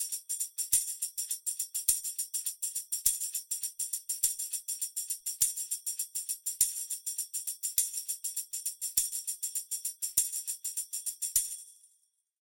Tambo-Pattern 01
(c) Anssi Tenhunen 2012